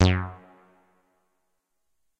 MOOG BASS SPACE ECHO F#
moog minitaur bass roland space echo
space, minitaur, moog, echo, bass, roland